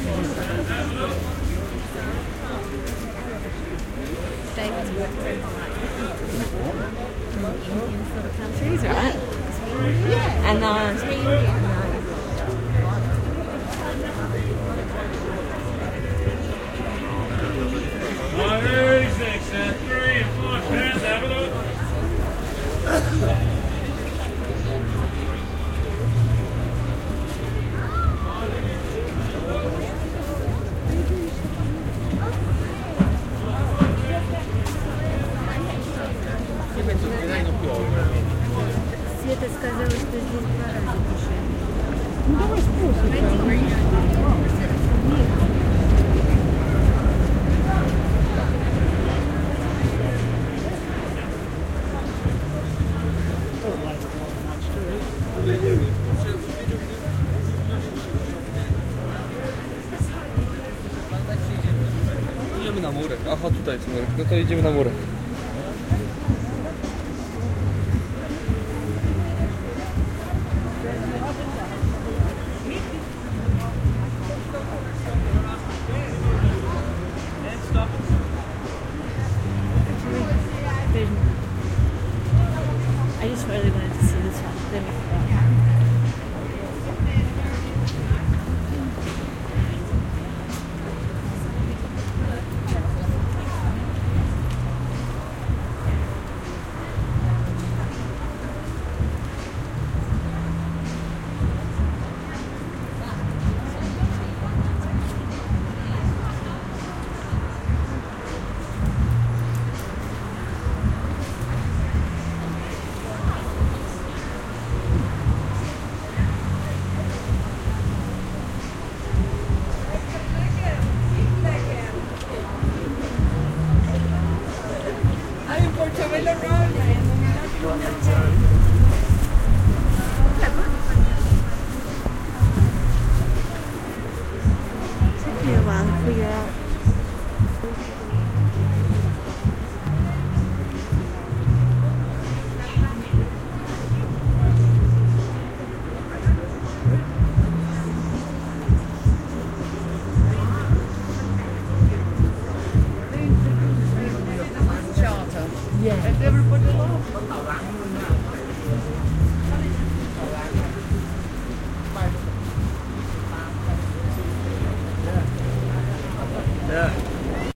A walk through the Portobello Road street market in London, summer, 2004. Lots of crowd noise, a street vendor at the beginning ("'ave a look!"), a train passing overhead in the middle of the clip, and a number of people talking as they passed me. Many of them were talking Russian, for some reason. Music from a vendor's boom box in the background of the last half.